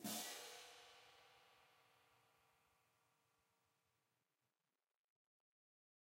Marching Hand Cymbal Pair Volume 01
This sample is part of a multi-velocity pack recording of a pair of marching hand cymbals clashed together.
cymbals, marching, orchestral, symphonic